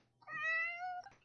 A 'meow' sound from my kitty Luna. Recorded with my microphone.
kitty, rawr, luna, cat, fx, meow, purr, sound